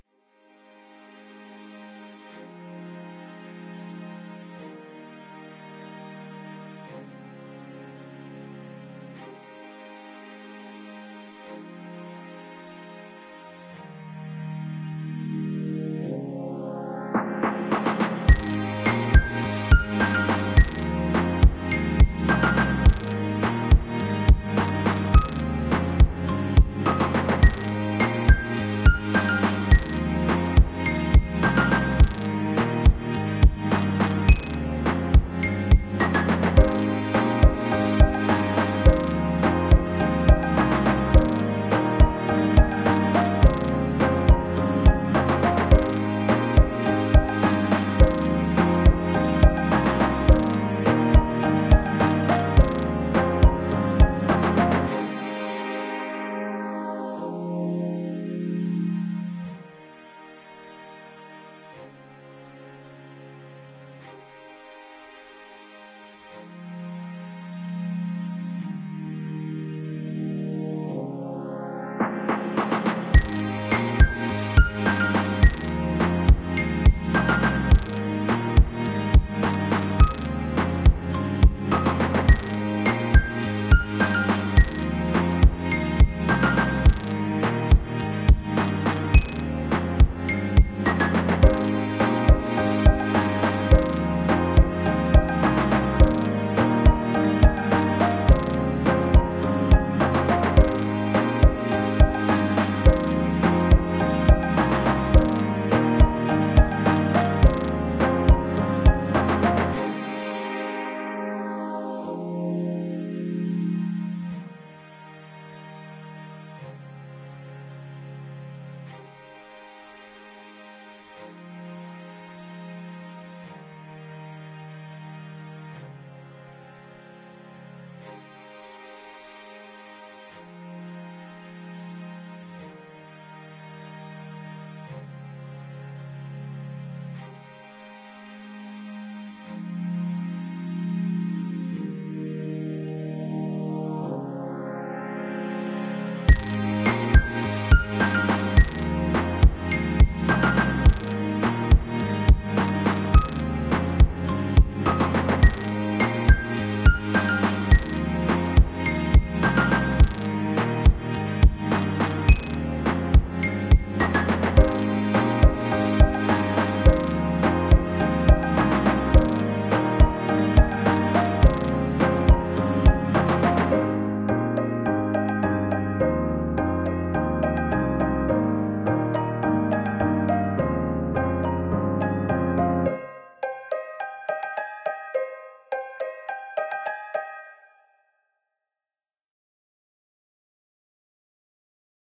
Happy Hip Hop Beat
background, beat, drum-loop, groovy, happy, hip-hop, hopeful, lo-fi, lofi, loop, loops, music, quantized, song, strings, vintage, vinyl